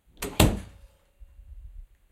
Door Shut
This sound was creating by shutting a door
closing, cupboard, design, domestic, door, effect, foley, handheld, lock, recorder, recording, shut, slam, sound, Zoom